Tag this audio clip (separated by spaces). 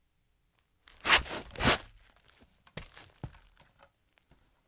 Dirt Scrape Feet